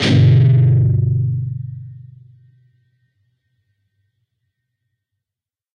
Dist Chr G up pm
E (6th) string 3rd fret, A (5th) string 2nd fret, and D (4th) string, open. Up strum. Palm mute.
chords, distortion, guitar-chords, rhythm